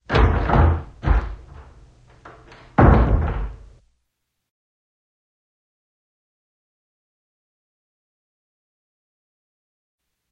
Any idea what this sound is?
Door Opening And Closing
door opening and shutting - previously listed as car door by accident.
close, door, open